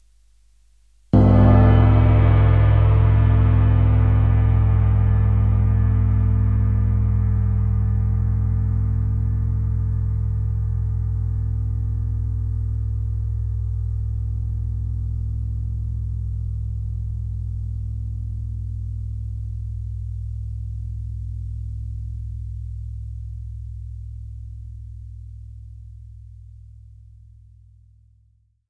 Sound of big 24" Paiste 2002 ride. Hit with felt tip stick.
bell, cymbal, felt, ride